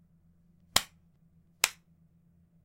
Slapping Face
The sound of a bare hand slapping a bare face at medium strength.
OWI, action, body, face, focusrite-scarlett-2i2, slapping